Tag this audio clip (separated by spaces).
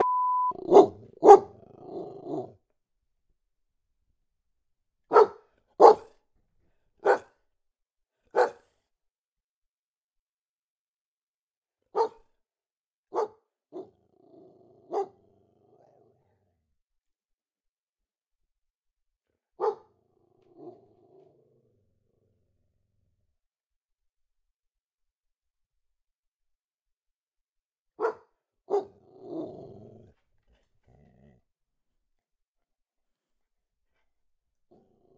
animals growl pets animal dogs bark dog pet growling barking